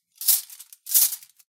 I recorded these metal sounds using a handful of cutlery, jingling it about to get this sound. I was originally planning on using it for foley for a knight in armor, but in the end decided I didn't need these files so thought I'd share them here :)
cutlery, jingle, metal